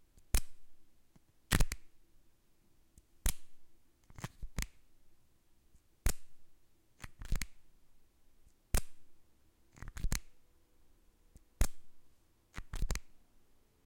Removing and replacing a pen cap. Recorded with AT4021s into a Modified Marantz PMD661.
foley, pen, click, pop, zip, cap